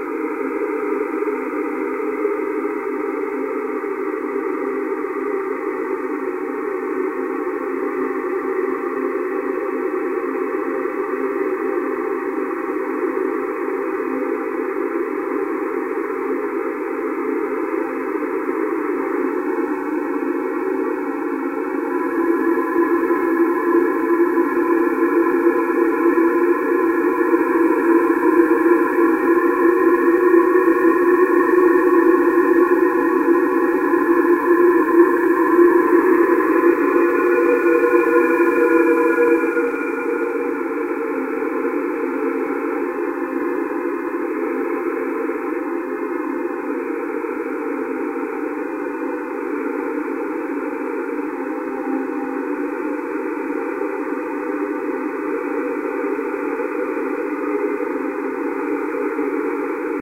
IMG 4336 1kl
the sample is created out of an image from a place in vienna
image, synthesized